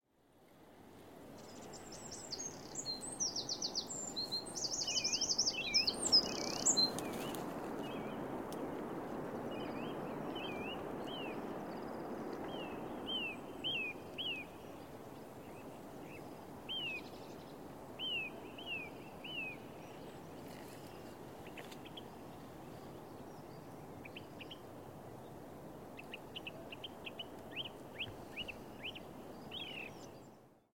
bird in oostduinen 21
Birds singing in oostduinen park in Scheveningen, The Netherlands. Recorded with a zoom H4n using a Sony ECM-678/9X Shotgun Microphone.
Evening- 08-03-2015